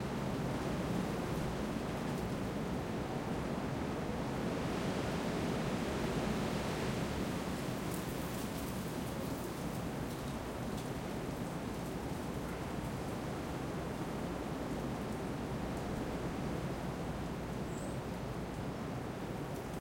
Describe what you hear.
Strong Wind Recorded with Soundfield Microphone Manchester 2
This is a strong wind recorded in Whalley Range Manchester with a Calrec SoundField microphone using Neve Preamps into Apogee converters. Encoded to stereo using the Soundfield plugin